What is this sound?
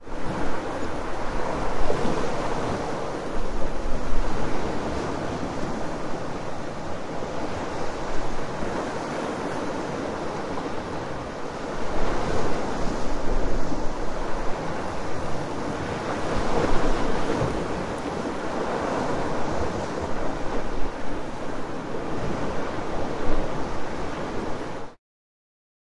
Recording of waves on a calm day. Tascam DR-100